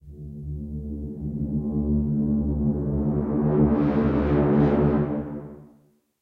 HORNS - EDITED - 1
braams, horns, trumpet